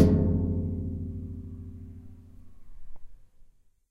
metal hit4
low, metal, hit